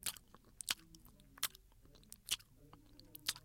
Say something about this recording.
O som representa uma pessoa mastigando, e foi gravado com um microfone Condensador AKG C414